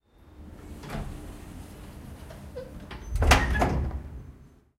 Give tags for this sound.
close; closing; door; elevator; lift; mechanical; open; opening; sliding

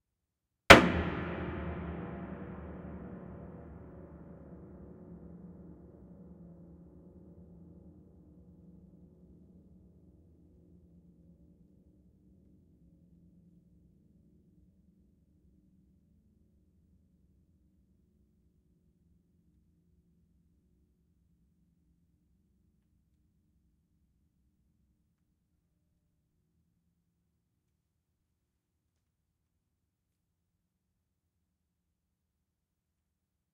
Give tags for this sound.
effect; piano; industrial; soundboard; horror; fx; sound-effect; percussion; sound; acoustic

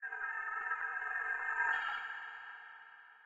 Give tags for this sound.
sfx; effect; soundfx

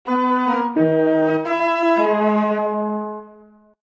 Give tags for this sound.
film; story; infantil; fun; funny; comedy; humor; cartoons; dibujos; samples; infancia; comedia

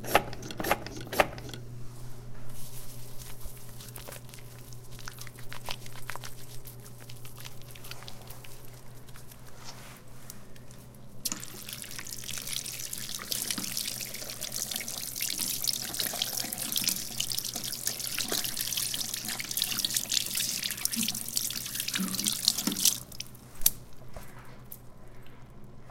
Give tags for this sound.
flush; toilet; wc

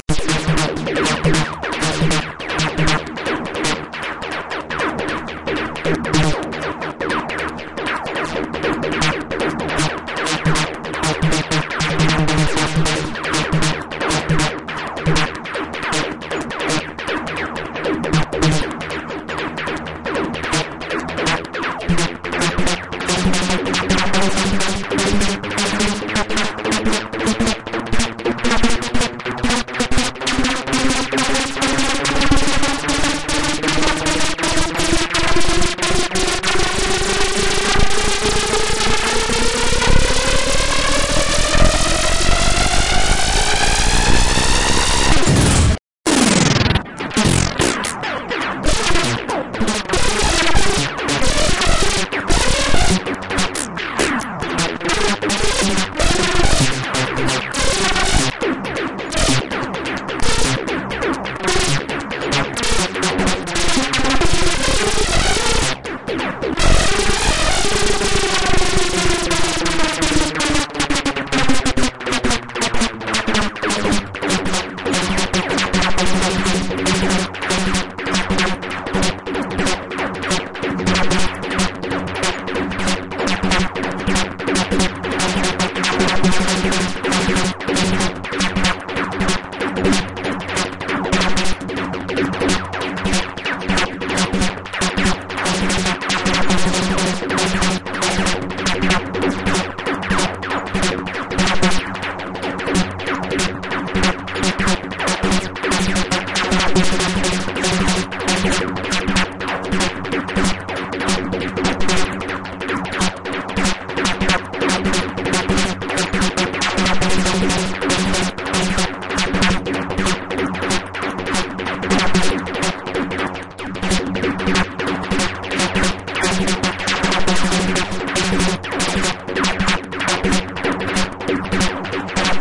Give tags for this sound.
drum; processed; psytrance

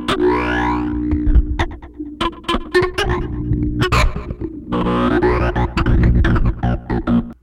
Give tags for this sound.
electric; kitchen; processed; radiation; robotic

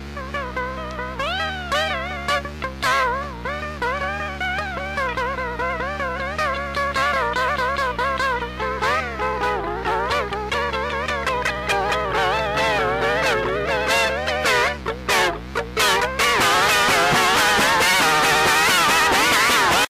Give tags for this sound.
trebly-guitar; trebly; treble; tapeloop; warped-tape; tape; warped; distorted-guitar; guitar; warp; warped-guitar

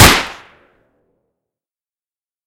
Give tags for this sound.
Gunshot; Pistol

